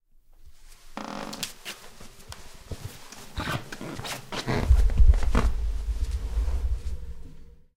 Schritte - Wohnung innen, Socken 1
Footsteps inside apartment, wearing socks
Version 1
apartment, field-recording, flat, footsteps, inside, room, socks